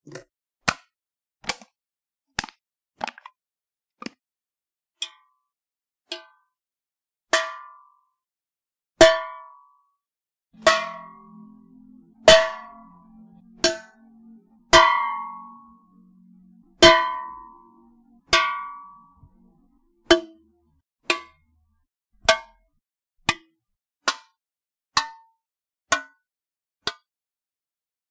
An empty soda can being crumpled and tapped.
Microphone: Zoom H2
Soda: I can't remember
clang, empty-can, hollow, impact, metal, metallic, small, soda-can, strike